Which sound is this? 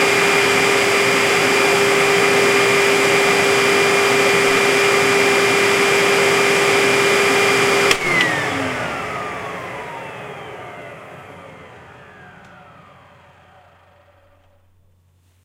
20121230 vacuum.cleaner.end.01
Panasonic vacuum cleaner ending. Audiotechnica BP4025, Shure FP24 preamp, PCM-M10 recorder